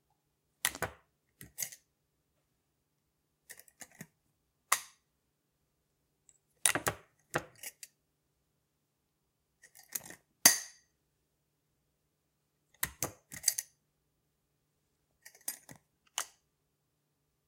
Latch Clicks 2
Opening/closing the metal latch on a small glass jar.
small, clack, glass, container, click, mechanical